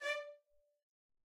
One-shot from Versilian Studios Chamber Orchestra 2: Community Edition sampling project.
Instrument family: Strings
Instrument: Cello Section
Articulation: spiccato
Note: D5
Midi note: 74
Midi velocity (center): 31
Microphone: 2x Rode NT1-A spaced pair, 1 Royer R-101.
Performer: Cristobal Cruz-Garcia, Addy Harris, Parker Ousley
cello, cello-section, d5, midi-note-74, midi-velocity-31, multisample, single-note, spiccato, strings, vsco-2